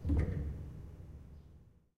Ambient 08 Big Pedal-16bit
piano, ambience, pedal, hammer, keys, pedal-press, bench, piano-bench, noise, background, creaks, stereo
stereo, keys, piano-bench, piano, pedal, background, ambience, pedal-press, noise, creaks, bench, hammer